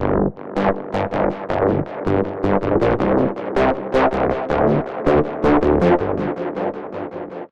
80 bpm Bassy Sound. Dubstep or Dnb, heavily processed. Made in Absynth 5 with the builtin Recorder
80, bass, bpm, electronic, loop, n, processed